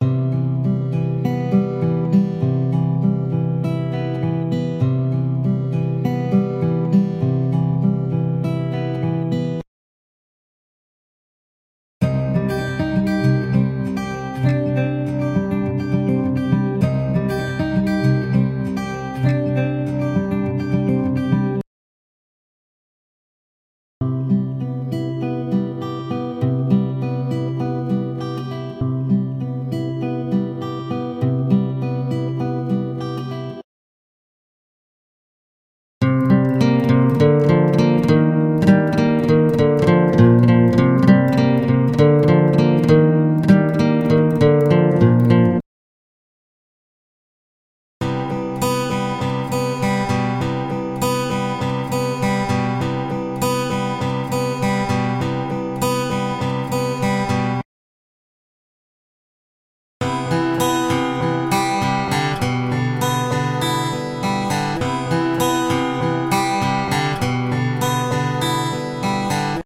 acoustic picking guitar 100bpm key of C
free acoustic guitar loops.
acoustic, groovy, loops